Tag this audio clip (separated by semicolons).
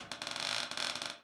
boards; chair; creak; creaking; creaky; door; floor; floorboards; hallway; haunted; house; rocking; rocking-chair; settling; squeak; wood; wooden